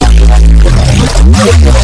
Distorted Reese Hit
This is an extremely unconventional sound. I actually made two reeses in harmor but I didnt distort them. I made a pattern with them both having the same midi and then I recorded that. I then distorted and resampled that recording multiple times. This was the end product.
bass, reese, fl-studio, hit, resampled, harmor